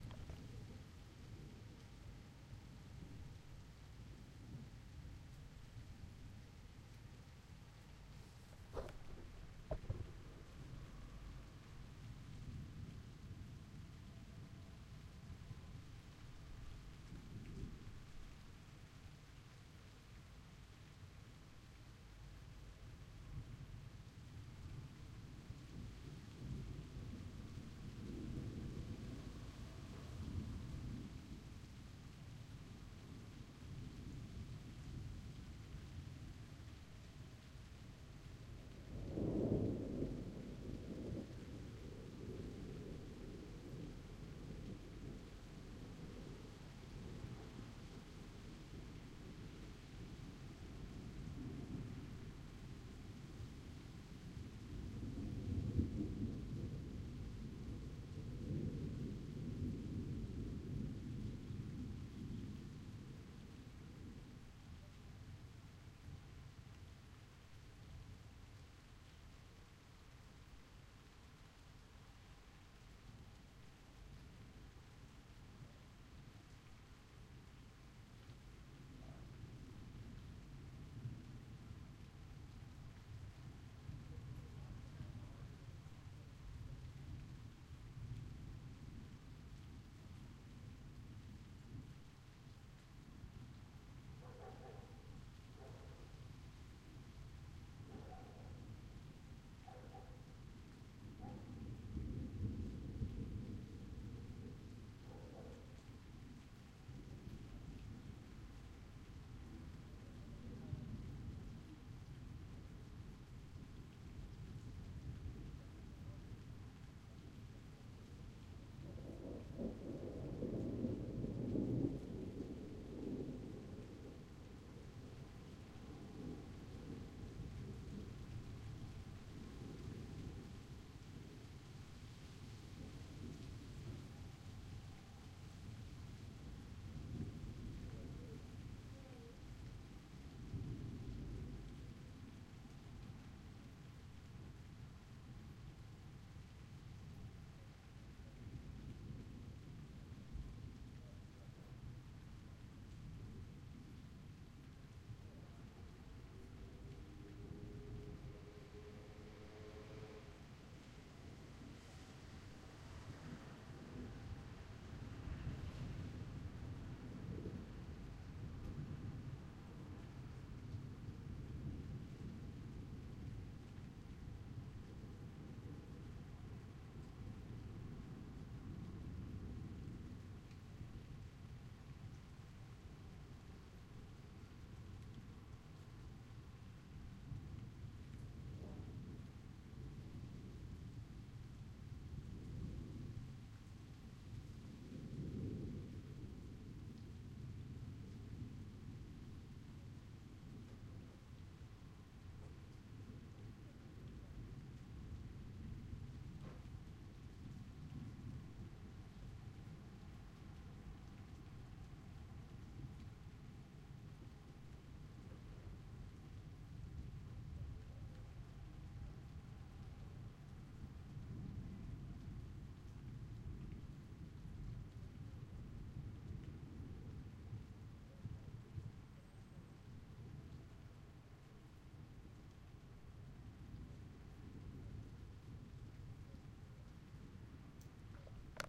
distant thunder and rain 2
The sound of distant thunder and rain near to the Med.